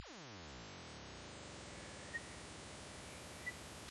An odd start up sound
personal, OS, up, start-up, windows, operating, computer, PC, machine, interface, system, start